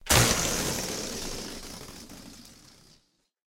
Balloon-Deflate-03
Balloon deflating. Recorded with Zoom H4